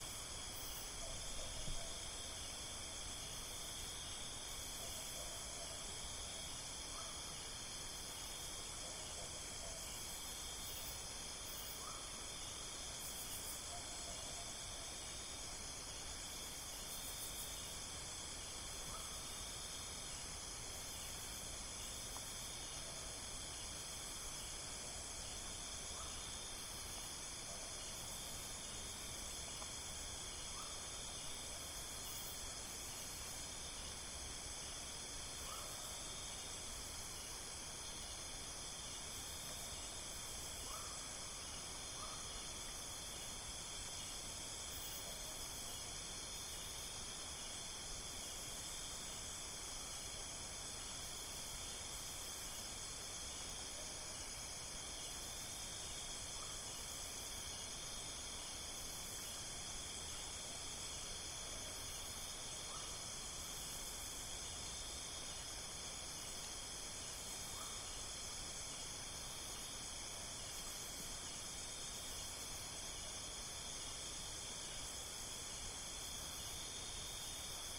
Night Forest 1
This is recorded using Zoom H6 XY configured microphones with 120-degree directionality on both mics with no stand holding it, so there might have some noise from holding the microphone.
It was recorded in the middle of the night in a windy village area in the mountain called Janda Baik in Pahang, Malaysia.
This is the first capture of five.
midnight, forest, summer, nature, field-recording, night, insects